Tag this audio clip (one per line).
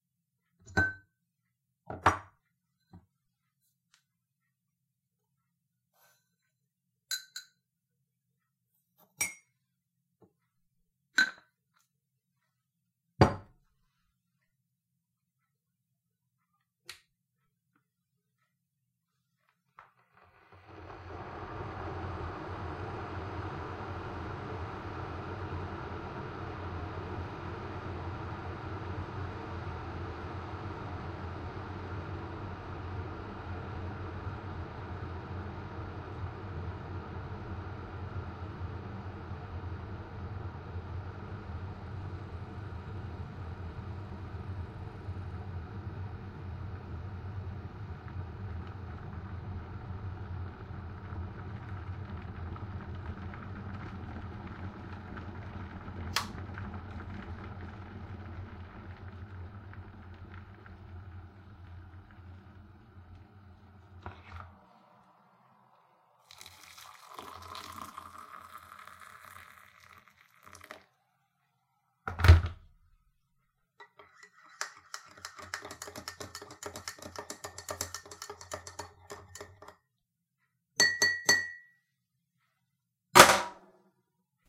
boil
coffee
cup
household
kitchen
pour
short
sink
spoon
stir
throw
water